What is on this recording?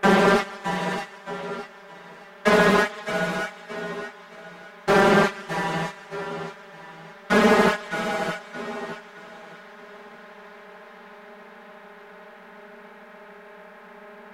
horror effect2
made with vst instruments
pad, soundeffect, trailer, background, thrill, dramatic, ambience, drama, film, spooky, music, dark, scary, cinematic, creepy, horror, sfx, movie, mood, suspense, background-sound, deep, space, drone, soundscape, weird, sci-fi, thriller, ambient, atmosphere